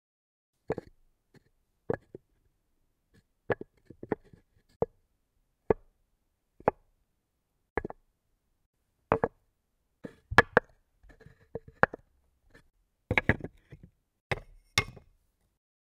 sonidos madera
close-up, friction, golpe, hit, madera, tap, wood, wooden